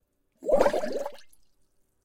Short Burst of bubbles